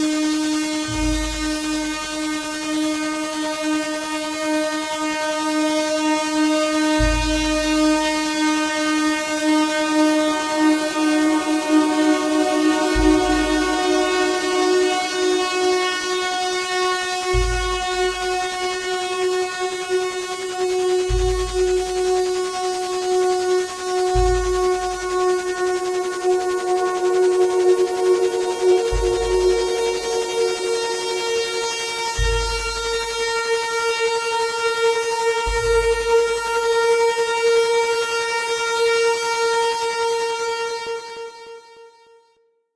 Shadow Maker-Dining Room
What awaits you in the Dining Room?
What you hear is the sound of an old mystic Engine, or something else, i don´t know. I made it with Audacity. Use it if you want, you don´t have to ask me to. But i would be nice if you tell me, That you used it in something.
Ambiance, Ambient, Cinematic, Engine, Entrance, Evil, Film, Horror, Light, Maker, Movie, Nightmare, Spooky